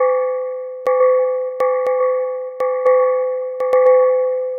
Cow bell 1
I recently made a sound in Audacity over the summer for use in game development. I don't mind
this is the sound of Cowbells
Sorry if I didn't describe it well enough since I am still new to music making
Audacity, Cowbells, sound